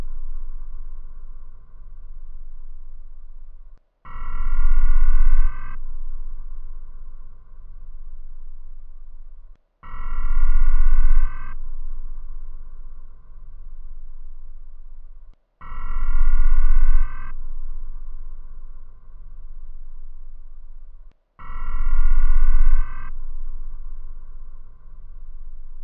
Alarm sound 13
A futuristic alarm sound
Alarms, Bells, Electronic, Futuristic, Noise, Sci-Fi, Space, Whistles